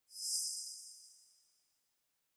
flower blossom for game
android, arcade, delay, effect, event, game, mobile, reverb, sfx, sound, sound-design, synth